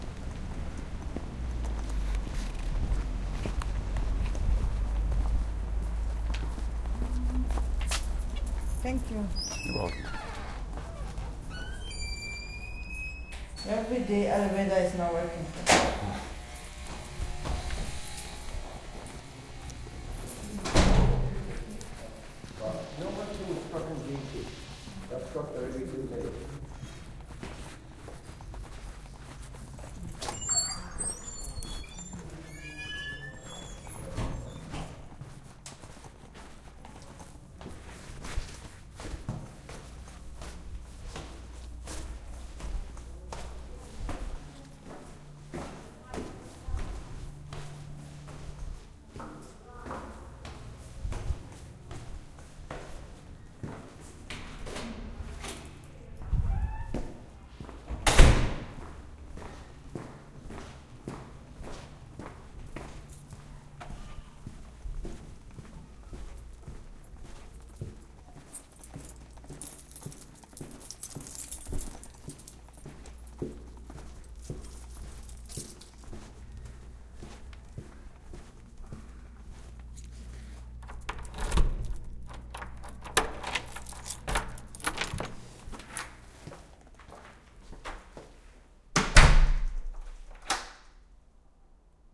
Walking into my apartment building Sunday afternoon while everyone waits for the elevator
apartment-building, buzzer, door-close, entrance, field-recording, footsteps, lock-and-key